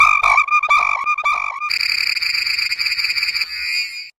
This rubin coloured Southern Bandsinger is nearly extinguished.